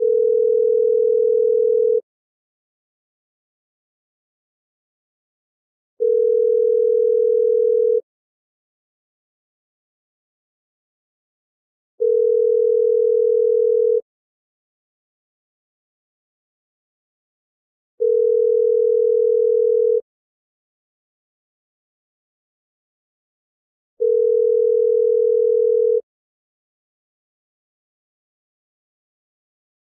Ring Tone
Tone generated when you make an outbound call on a landline or cell phone. Created from scratch using signal generators.